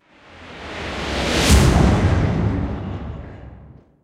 Impact sfx 011
generator, motor, sounds, running, buzzing, machinery, mechanical, metal, hum, buzz, mill, operation, drill, engine, power, run, industrial, factory, stinger, sfx, machine, hit, impact, saw